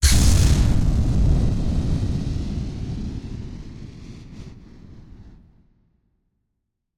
Missile Blast 1
A warm, fuzzy explodey sound effect made from the close, centered recordings of carbon dioxide releases from fizzy drinks (especially litre bottles due to the size and pressure) and blowing into the microphone for the tail of the sound. In doing so, the editation went like this:
CARS/SLED
(Compression, Automation, Reverberation, Synth/Sampling, Limiting, Equalization, Distortion)
explode, explosion, attack, kaboom, missile, destruction